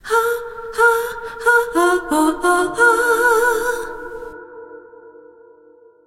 staccato notes sung
Me singing a few staccato notes. I don't know why, but it makes me think of the 80's. 90bpm 4/4 time.
Thank you for remembering to credit to Katarina Rose in your song/project description. Just write "vocal sample by Katarina Rose" in the project description. It's as easy as that!
Recorded in Ardour, using a t.bone sct-2000 tube mic, and edirol ua-4fx recording interface. Added compression, reverb, and eq adjustments. Any squeaking sounds present are only on the streamed version; the downloadable clip is high quality and squeak-free.